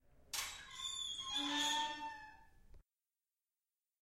The bathroom door squeaks when opening it. It is a manually-produced wooden sound. It has been recorded with the Zoom Handy Recorder H2 in the restroom of the Tallers building in the Pompeu Fabra University, Barcelona. Edited with Audacity by adding a fade-in and a fade-out.
bathroom, squeak, close, zoomH2handy, WC, tallers, open, door, squeaky, UPF-CS14, campus-upf, creak, wood